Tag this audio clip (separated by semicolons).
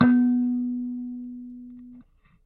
amp; bleep; blip; bloop; contact-mic; electric; kalimba; mbira; piezo; thumb-piano; tines; tone